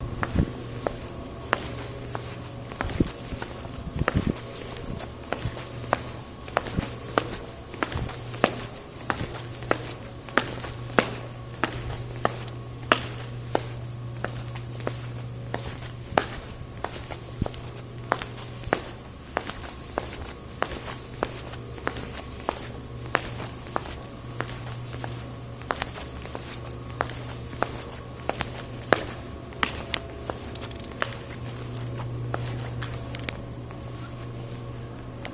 The sound of footsteps walking up and down a hallway. Perfect for a horror film if you prefer.
Recorded with an Android MyTouch 4G LG-C800.